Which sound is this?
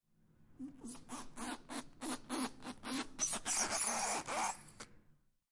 Geology Bannister Squeak
This recording is of a hand squeaking down the banister of a spiral staircase at Stanford University
stanford, squeak, hand, banister, stanford-university, aip09, wood, stairs